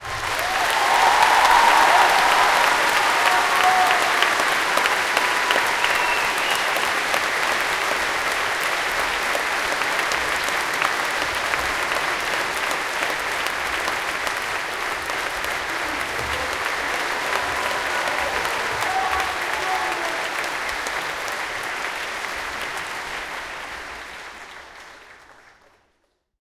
Applause Bravo
People claping and screaming BRAVO!
Not processed, its up to you)
clap, show, people, applause, polite, cheers, theater, cheer, foley, audience, performance, crowd, loud